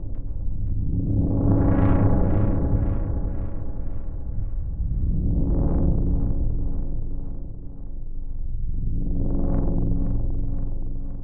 An abstract electronic sound produced using oscillator/Arpeggiator/LFO/delay vst´s and and edited in audition.
perhaps suitable for dark ambient industrial soundscapes / sound design
oscillator, abstract, sound, electronic